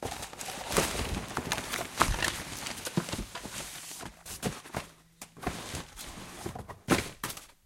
Rummaging in closet
clatter, objects, random, rumble